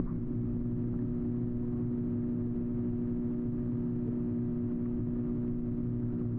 Electrical Hum.L
An electrical buzzing
Buzz, Electric, sound-effects